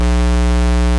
squarer wave
A square wave generated in Ableton Live using simpler.
basic, osc, oscillation, square, sound, wave, synth